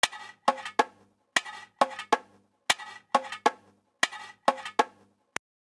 JV bongo loops for ya 3!
Closed micking, small condenser mics and transient modulator (a simple optical compressor he made) to obtain a 'congatronic' flair. Bongotronic for ya!
congatronics, loops, samples, tribal, Unorthodox